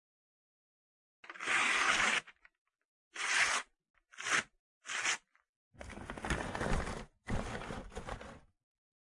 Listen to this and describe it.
A bedroom sound effect. Part of my '101 Sound FX Collection'